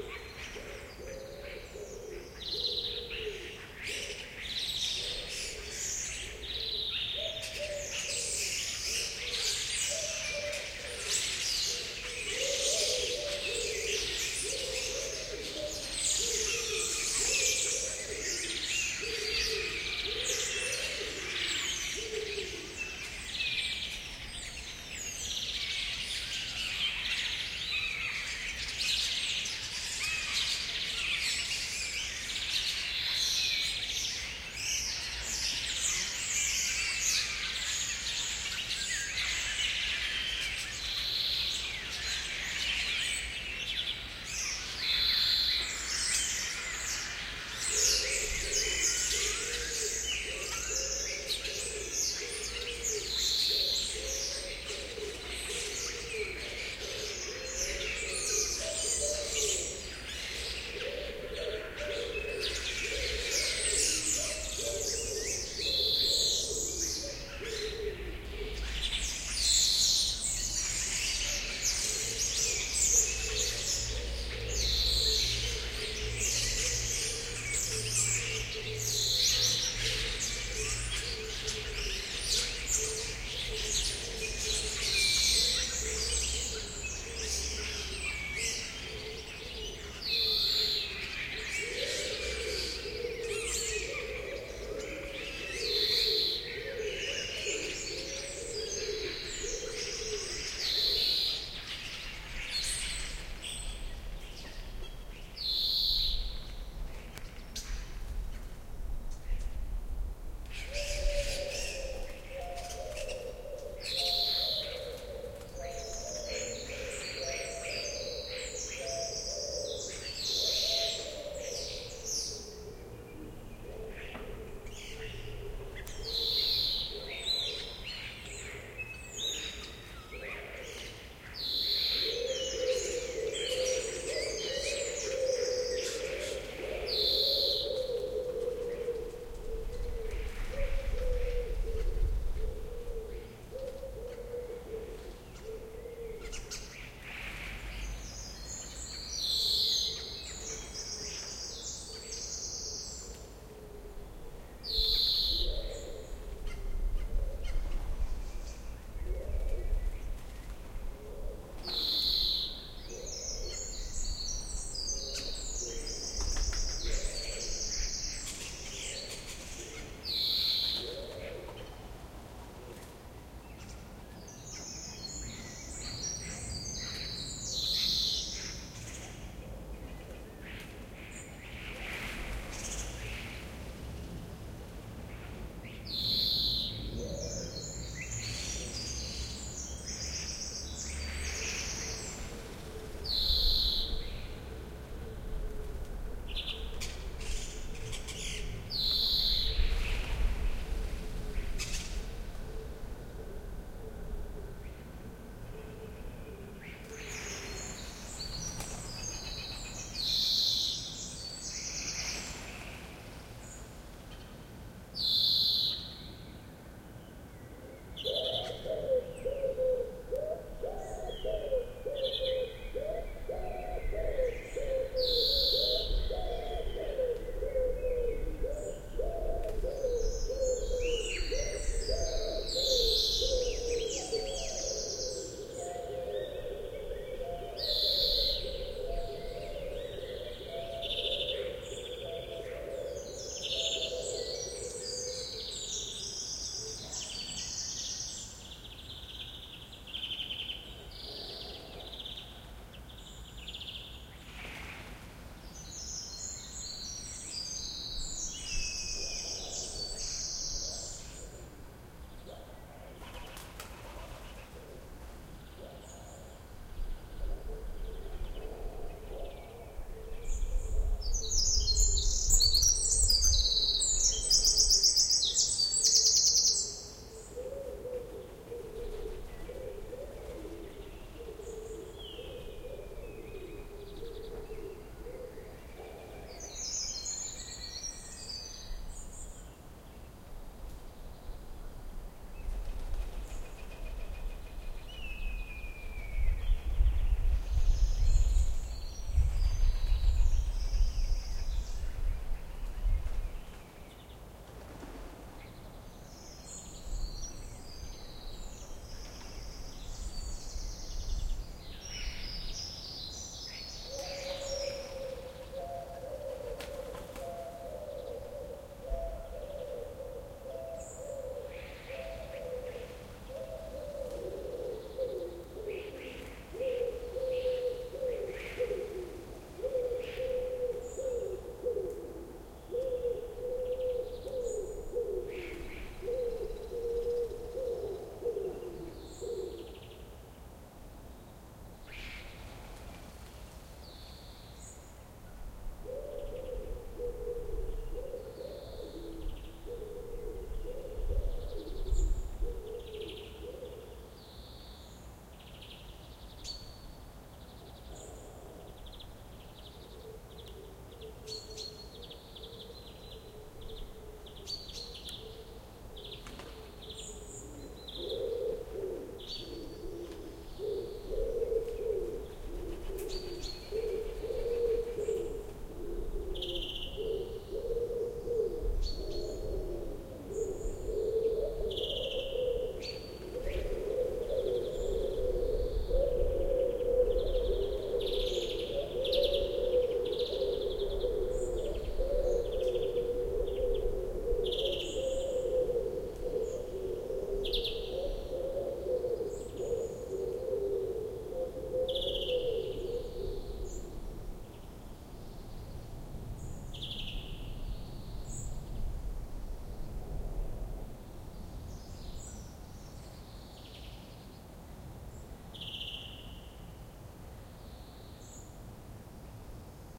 Starlings leaving backyard
During the winter flocks of starlings are arriving each evening in town
to sleep on certain trees before setting of in the morning again. This
recording was done in early march with a Sony Hi-MD recorder in the PCM mode and an AT 822 microphone and Prefer preamp.
backyard, birds, birdsong, dawnchorus, field-recording, nature, roost, starling, starlings, town